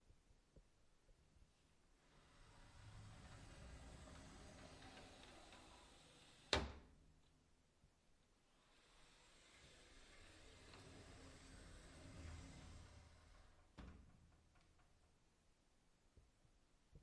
Mirrored sliding closet door. Opening and closing.
Recorded October 22, 2018
with Zoom H5